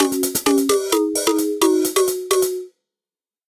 Short, single bar loops that use a unique tuning system (that I have described below). The end result of the tuning system, the timbre of the instrument, and the odd time signature (11/8) resulted in a sort of Rugrats-esque vibe. I named the pack based on the creators of Rugrats (Klasky-Csupo). The music has a similar sound, but it's definitely it's own entity.
There are sixteen basic progressions without drums and each particular pattern has subvariants with varying drum patterns.
What was used:
FL Studio 21
VST: Sytrus "Ethnic Hit"
FPC: Jayce Lewis Direct In
Tuning System: Dwarf Scale 11 <3>
Instead, the scale used is actually just-intoned (JI) meaning that simple ratios are used in lieu of using various roots of some interval (in the case of 12 tone temperament, each step is equal to the twelfth root of 2, then you take that number and you multiply that value by the frequency of a given note and it generates the next note above it).